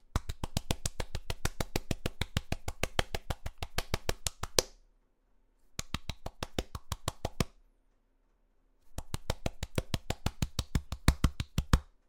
excited fast clapping
fast excited clapping